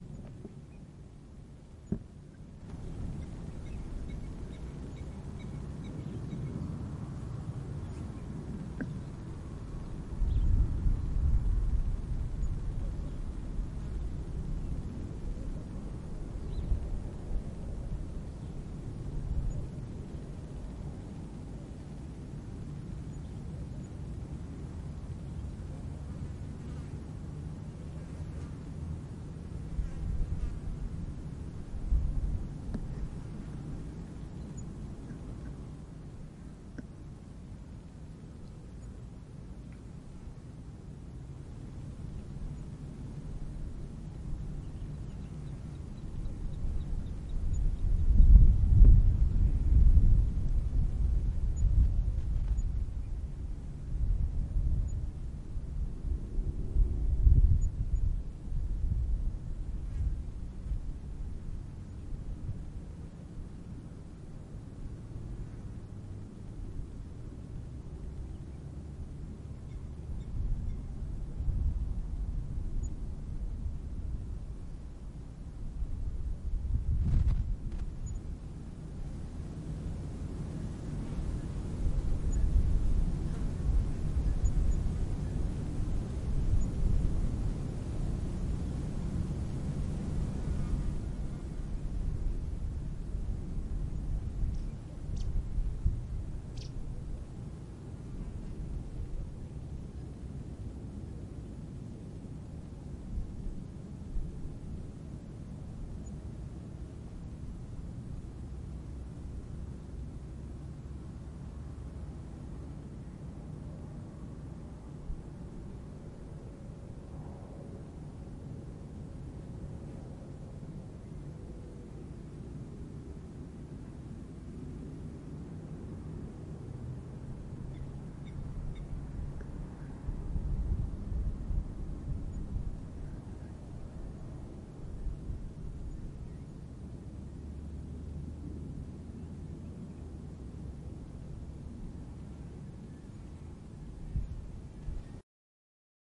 Recorded with H6 Zoom. An open field with a slight breeze.
ambiance, ambience, field, nature, open, outdoor, outside, OWI